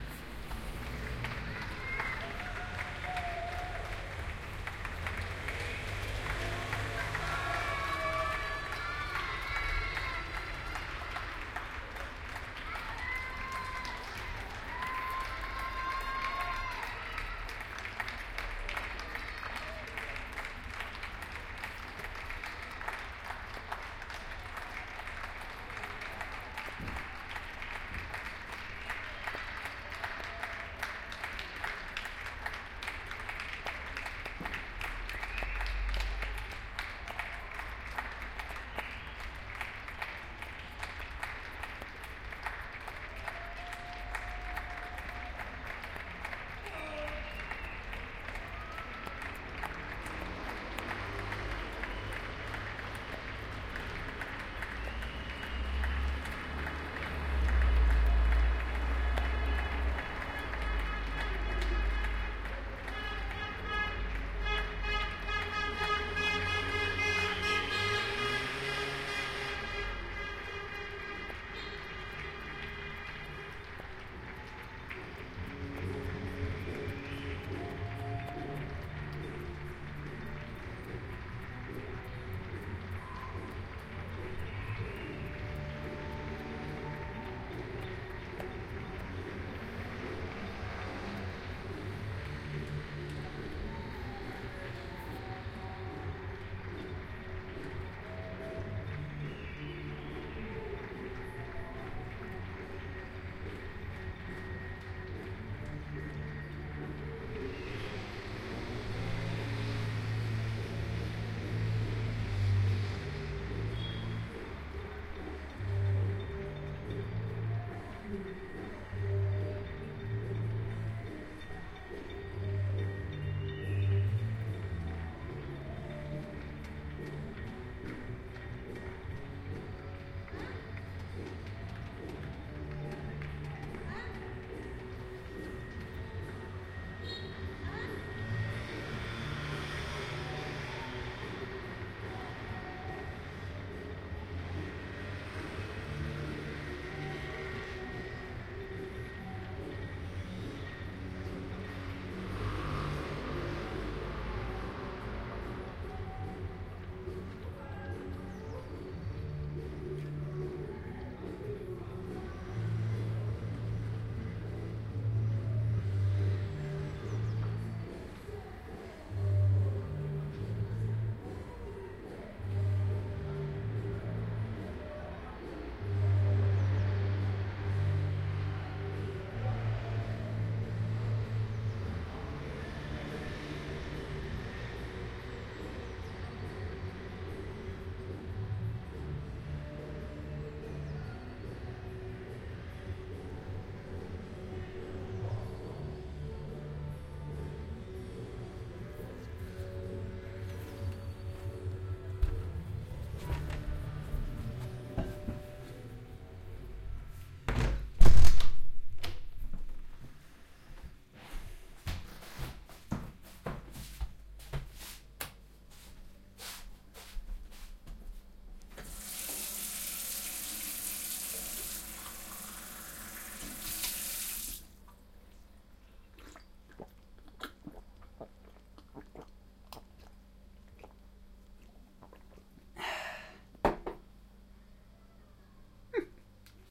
Paris 8pm from rue tolain balcony
daily applause for the people who help in these hard days of covid 19 recorded from my balcony in Paris
n.b. this is a BINAURAL recording with my OKM soundman microphones placed inside my ears, so for headphone use only (for best results)
background-sound, balcony, ConfinementSoundscape, paris